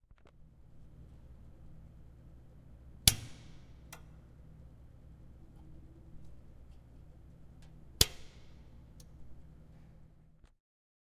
STE-015 Amplifier Stageline On : Of
Power button of a stageline amplifier.
amplifier, button, campus-upf, power, stageline, UPF-CS12